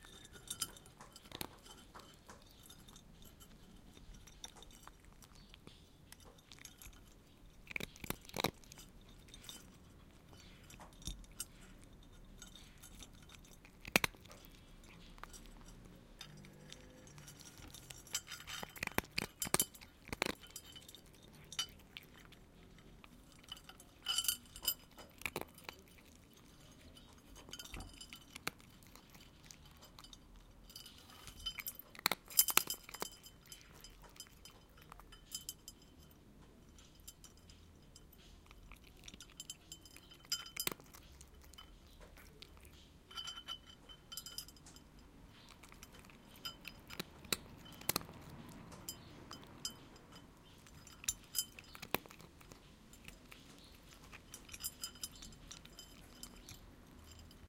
cat with collar eating food
Cat eating cat food with the sound of the collar against the bowl.